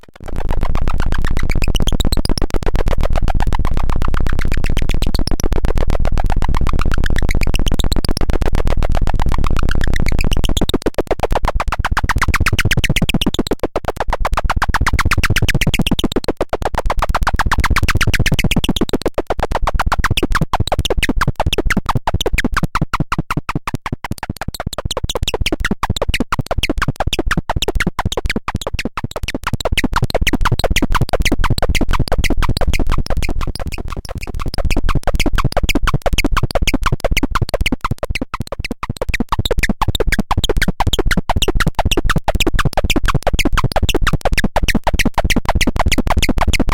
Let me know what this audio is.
5hsiazvc90pnh4rk
sounds created with modular synthesizer
biiip, modular